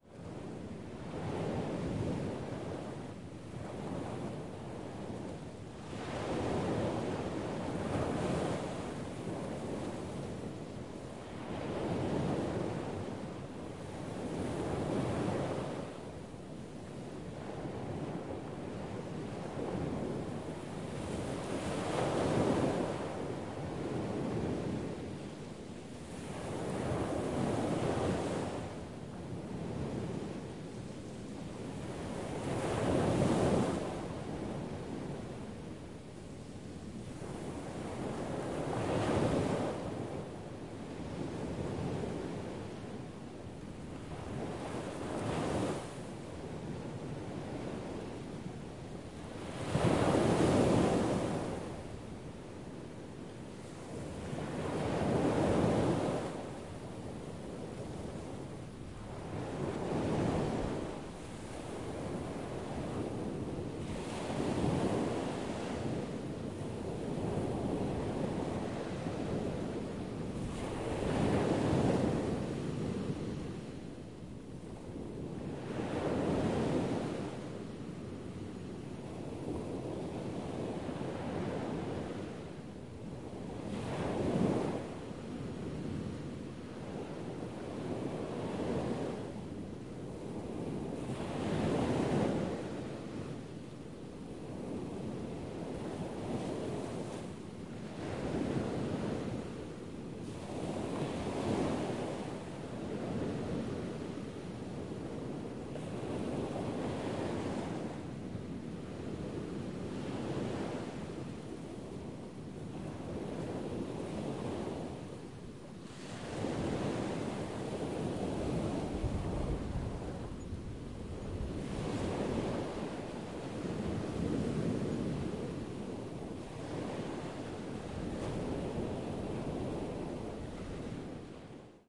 Field recording of the beach, Recorded with a Zoom H4n.
For more high quality sound effects and/or field-recordings, please contact us.